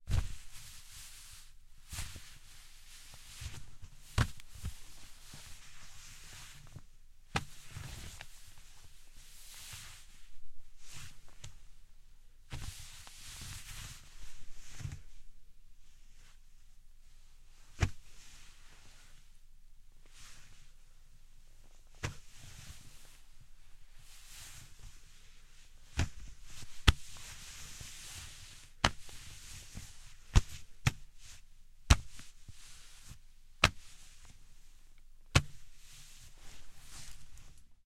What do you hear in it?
Grabbing at someone wearing a cotton shirt.